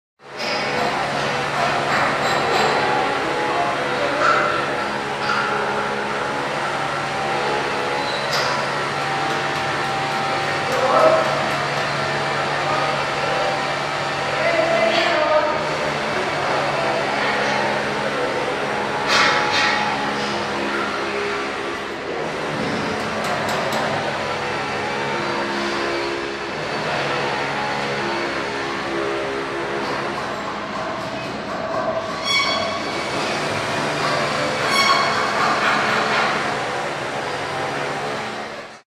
Building site interior ambience
Recorded on Marantz PMD661 with Rode NTG-2.
Interior ambience on a building site with a mechanical saw, hammering, metallic squeaks and voices of builders.
building, construction, field-recording, hammer, hammering, industrial, machine, mechanical, metal, petrol, saw, site